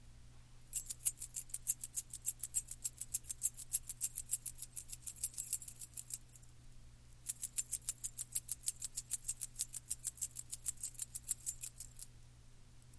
shaking car keys back and forth
jingling, keys, MTC500-M002-s14